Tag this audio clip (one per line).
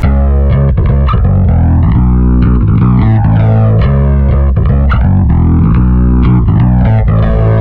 bassline
bass
electric
bpm
distorted
aggressive